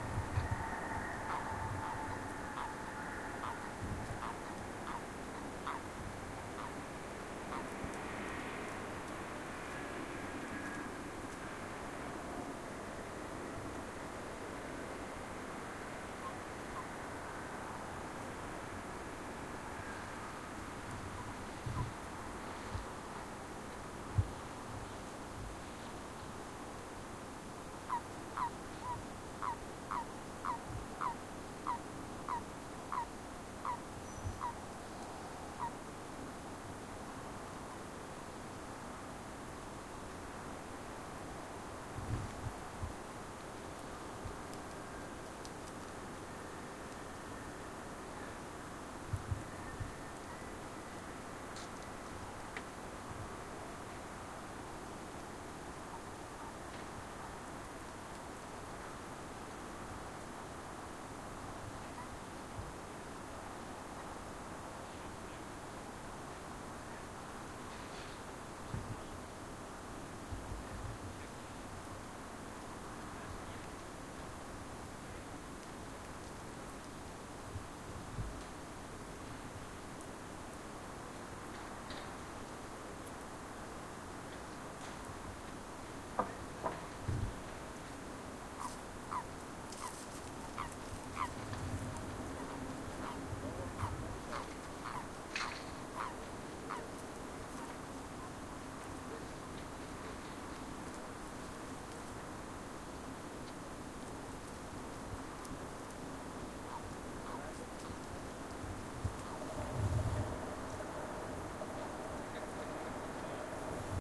Abend Dämmerung Ambi
Atmo in a Village near Zurich at Night
Fieldrecorder, Feldaufnahme, Atmos, Ambi, atmosphere, Atmosphäre, Schoeps Microfons Mikrofone, Sound Devices 788T